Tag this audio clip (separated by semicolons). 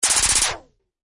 pistol; shooting; fire; weapon; gun; handgun; army; shot; clip; audio; laser; noise